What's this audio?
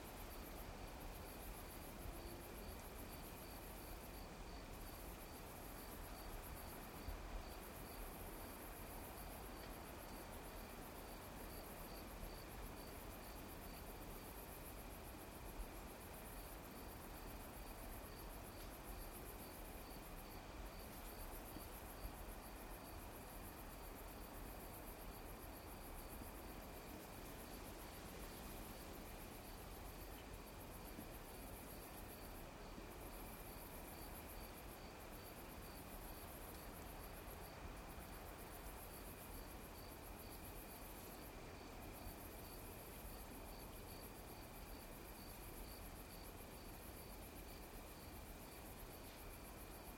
Edge of forest on a windy night. Some rustling leaves, insects and crickets.
Rode M3 > Marantz PMD661